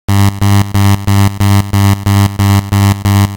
Red Alert Klaxon Re-Creation
Recreation of the Red Alert klaxon used in Star Trek - The Motion Picture and other original series cast movies. A square wave at the appropriate frequency, processed.
film, klaxon, motion-picture, movie, recreation, red-alert, star-trek, tmp, william-stone-iii